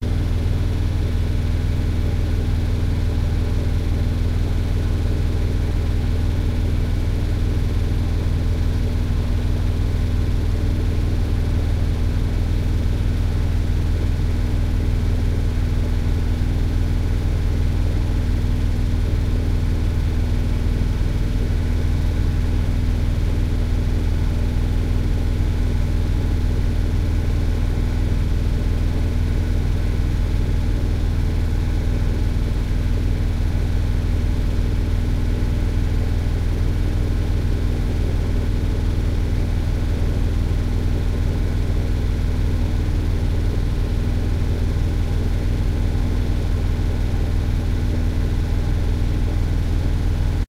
Yanmar boat engine sound during voyage near island Vis.